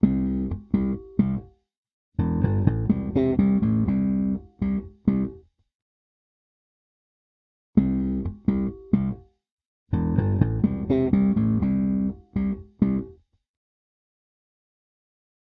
bass groove1+only bass
bass groove played by me :-)
bass, fun, funk, rock